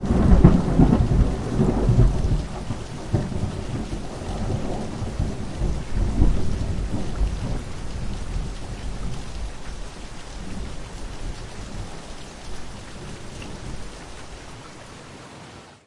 Storm roll
Recording of a thunderstorm that happened in September in the UK
Loud, Rain, Roll, Rumble, Storm, Thunder, Thunderstorm, Weather